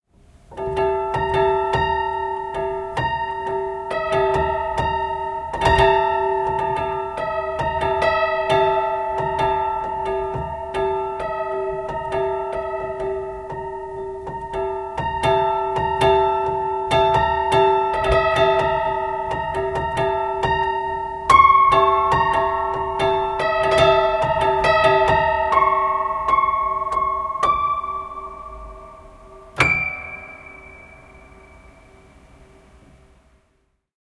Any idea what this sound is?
Detuned Piano Swingy 1
A whole bunch of broken piano sounds recorded with Zoom H4n
improvised, untuned, soundtrack, eery, out-of-tune, detuned, retro, thrilling, horror, filmic, old, vintage, creepy, spooky, upright, piano